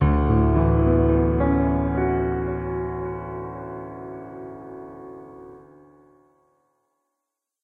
Debussy-like phrase, part of Piano moods pack.
mellow, phrase, piano